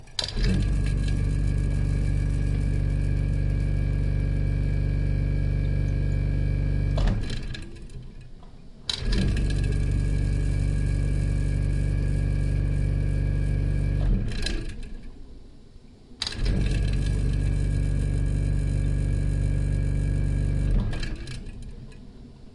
Refreg Start&stop 3 times
Old refrigerator starts and stops 3 times.